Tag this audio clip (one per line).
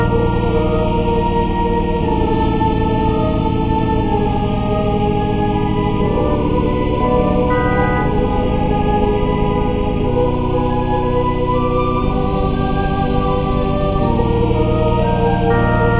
game
gameloop
games
loop
melody
music
organ
piano
sound
synth
tune